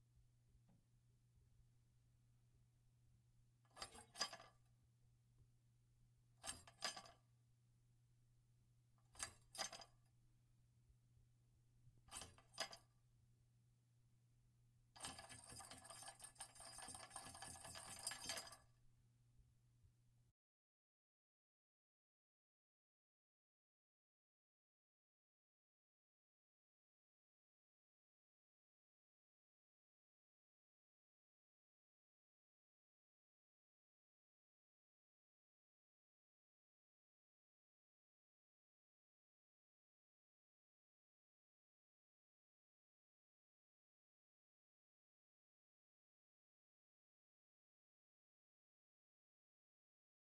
untitled toilet handle
handle, field-recording, toilet